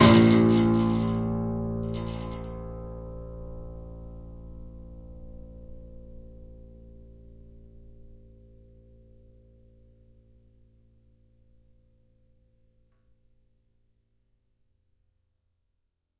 A plastic ruler stuck in piano strings recorded with Tascam DP008.
Une règle en plastique coincée dans les cordes graves du piano captée avec le flamboyant Tascam DP008.
detuned, piano, prepared